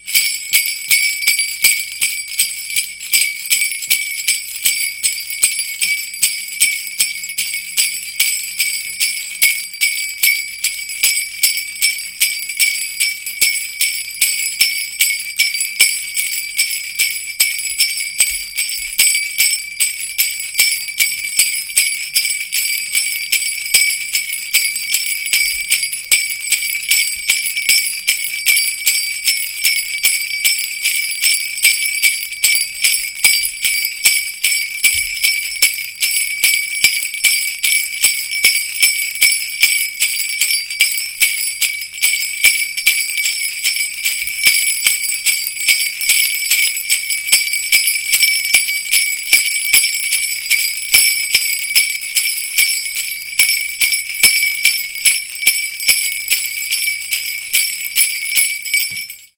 The Bells of Santa Claus
A simple recording of Santa Claus jingling his bells. It was recorded using Audacity and a Yeti microphone.
It can be looped easily, and would go well as background ambience to any type of Christmas project you may have on the go.